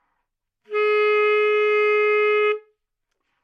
Sax Baritone - C#2
Part of the Good-sounds dataset of monophonic instrumental sounds.
instrument::sax_baritone
note::C#
octave::2
midi note::25
good-sounds-id::5340
good-sounds, sax, single-note